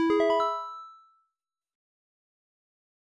8-bit sfx for completion or obtaining something in game.
8-bit, 8bit, arcade, chiptune, complete, game, retro, robot, success, win